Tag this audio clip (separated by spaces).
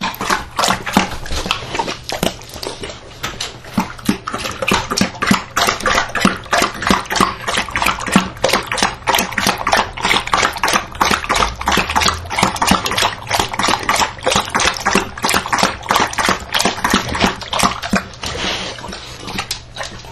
bulldog drink slobber lap dog